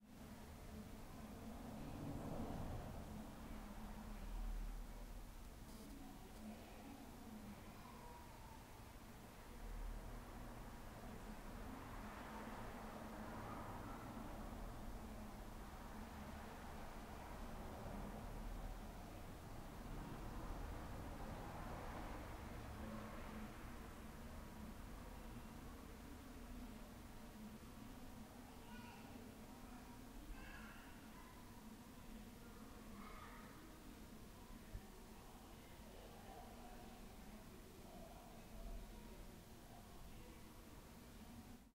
PALAZZO TROMBA DELLE SCALE ANDRONE
androne, efx, lollorecord, palazzo, popolari, scale